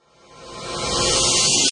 High quality whoosh sound. Ideal for film, TV, amateur production, video games and music.
Named from 00 - 32 (there are just too many to name)
swish, swoosh, whoosh